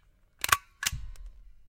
This is Folley made by a stapler to represent a gun loading.